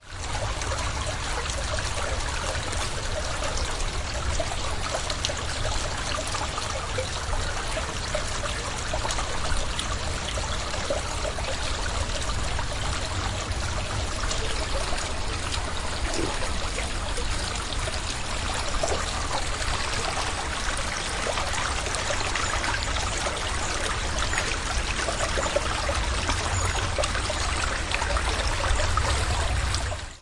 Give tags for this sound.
madrid
stream
jarama
river
spring
right

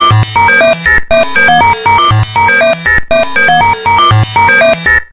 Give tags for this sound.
beep
dialing

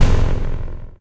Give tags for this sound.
electronic
percussion
stab

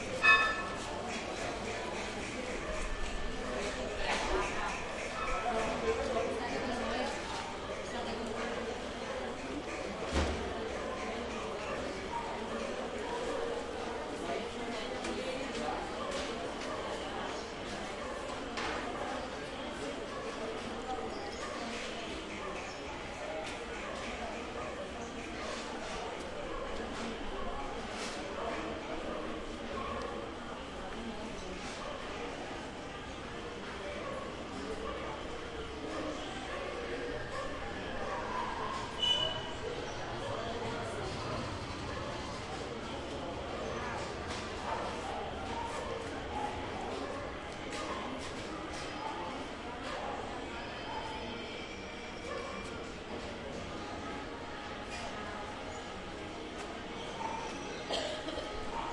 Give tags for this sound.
Old
street
activity
Cuba

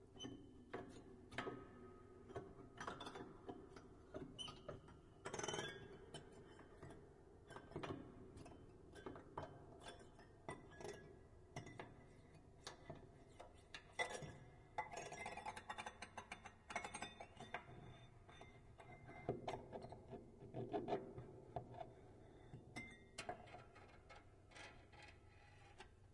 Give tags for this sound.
field-recording
piano
minimal
broken
texture

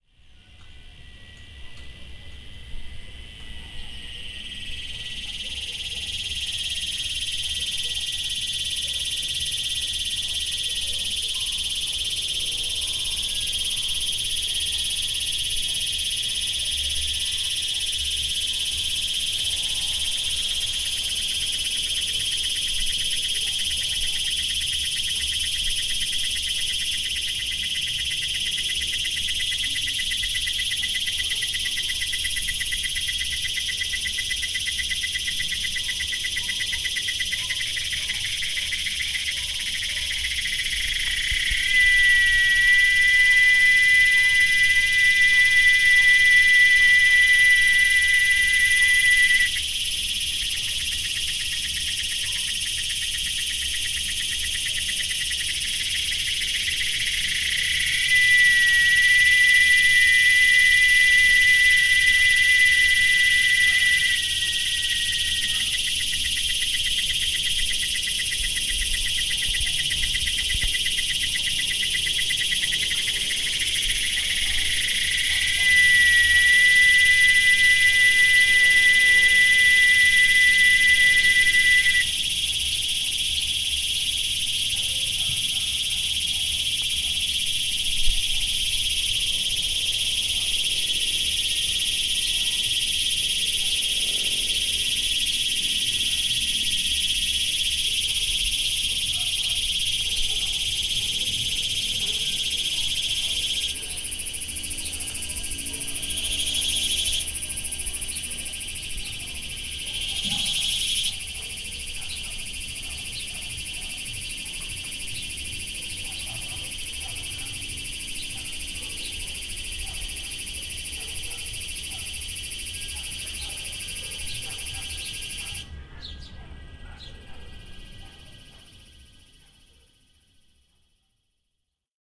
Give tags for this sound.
barking
insects
nature
field-recording
zoomh4
argentina
cicadas
ambience
dog
catamarca
summer
sound

